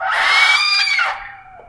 A door screech so horrifying that it sounds like the cry of a monster. One of my favorite sounds that I've ever been lucky enough to capture.